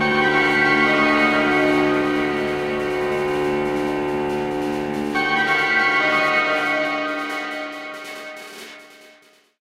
Futuristic Threathing March
Synth, bass strings, digital snare, futuristic sound effects. Haunting, threatening, spooky.
dark, idm, piano, glitch, futureistic, space, star, approaching, threatening, spooky, haunting, haunted, evil, planetary, war, spook, threatning, sound-effects, fx, spacy, stars, beat, future, threat, futuristic, march